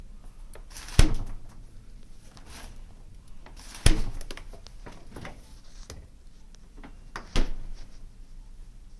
freezer door opening and closing
Pretty much what it sounds like! The opening is much quieter than the closing.
freezer, shut, opening, door, open, appliance, closing